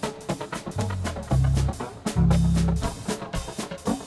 neodymium-loop
loop-able guitar doing "wacka chicka"
wackachicka, guitar, loop